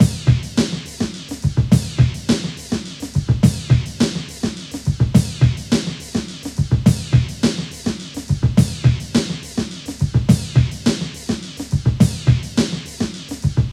BreakbeatEP-ALLC-140bpm
140 bpm break.